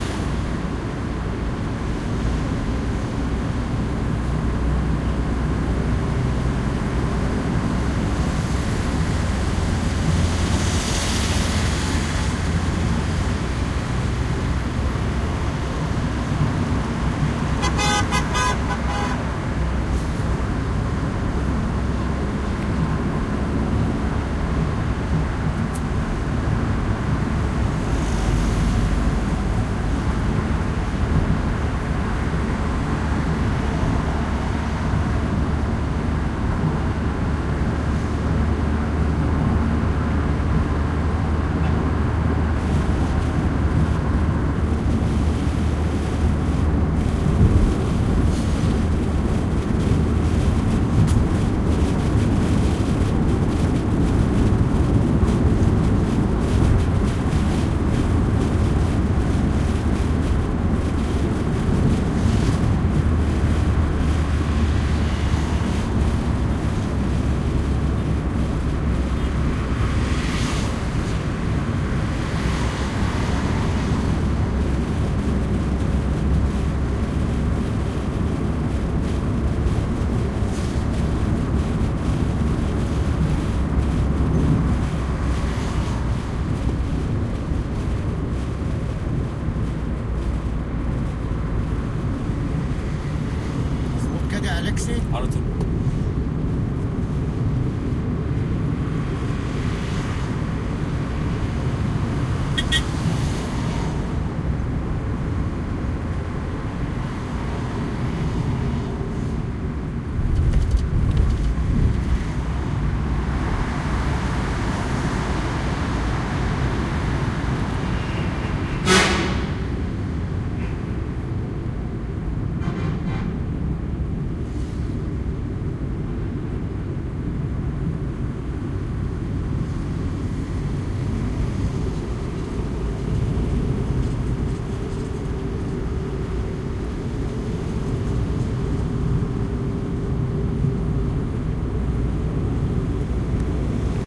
I recorded this sound with the Zoom H2N inside the car while driving on a highway.
The mode is Mid-Side. Raw Footage.